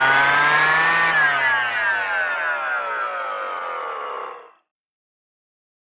Selfmade record sounds @ Home and edit with WaveLab6